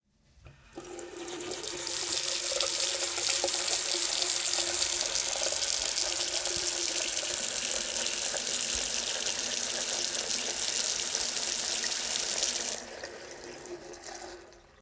Robinet lent
A little bit of water from a tap recorded on DAT (Tascam DAP-1) with a Sennheiser ME66 by G de Courtivron.